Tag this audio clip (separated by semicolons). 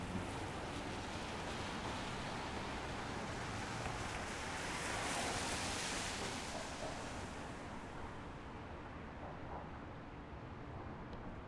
rain; road; car